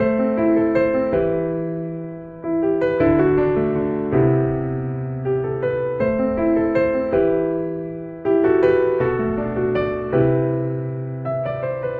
Emotional Piano 005 (Am-80)
Emotional Piano 005 Key: Am - BPM 80